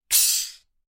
Bicycle Pump - Plastic - Fast Release 12
A bicycle pump recorded with a Zoom H6 and a Beyerdynamic MC740.
Valve, Pump, Gas, Pressure